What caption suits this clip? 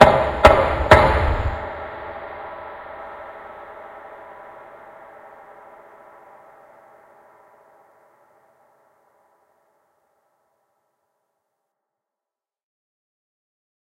door knock echo
A heavily stylised processed version of a recording I made of my knocking a large door at my home.
chap, knock, thump